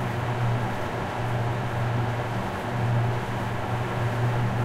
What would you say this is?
Electric machine engine large air-conditioner hum noise
Electric machine, engine, large air conditioning unit, hum, noise, field recording
Recording device: Roland R-26 portable digital recorder.
Microphone: Built-in directional XY stereo microphone.
Edited in: Adobe Audition (adjusted gain slightly, for a good signal level).
Date and location: October 2015, a rather large air conditioning unit in a public parking garage, in Sweden.
air-conditioner, electric-machine, engine, fan, freezer, hum, industrial, machine, mid-frequency, motor, motor-noise, noise, refrigerator, ventilation